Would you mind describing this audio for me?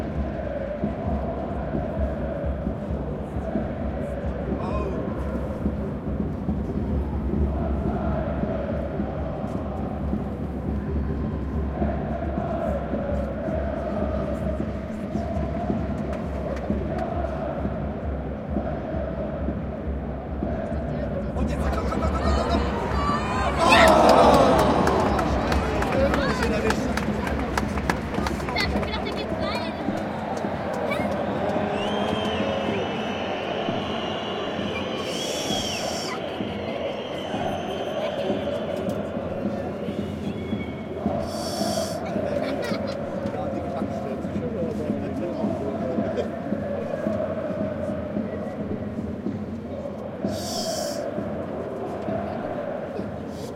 Field recording of the spectator's perspective of a German Regions' League football game in Leipzig's Red Bull Arena, on April 28th 2013. RB Leipzig is playing against FC Magdeburg. The recorder is situated amongst several spectators of all ages, several children are present and heard.
A botched attempt at scoring a goal by RB Leipzig. Spectators shout with mounting excitement, and then with sudden disappointment.
Recording was conducted in the RB Leipzig fans' block using a Zoom H2, mics set to 90° dispersion.